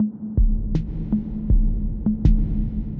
CWD F loop 12
cosmos, idm, loop, percussion, science-fiction, space, techno